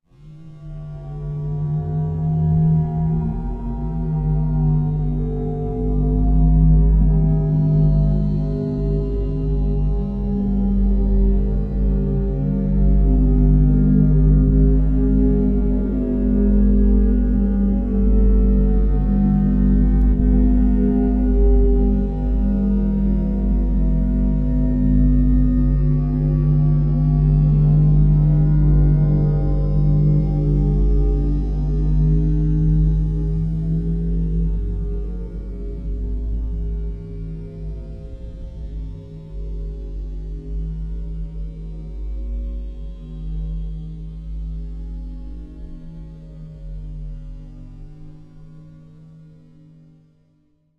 Time-Stretched Rubbed Metal
The origin of this sound was a recording I made with a Zoom H2 of a metal shower grate resonating from being rubbed by my finger. I made a sample which I then recorded live in Apple's Logic using a keyboard controller and finally processed and time-stretched in BIAS Peak.
processed, metallic, soundscape, synthesized, time-stretched, resonant, abstract